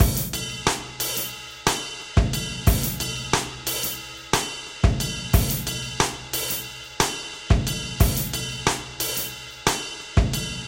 got a rhythm
A little drum loop I put together for fun.
drum-loop, drums, percussion-loop, rhythm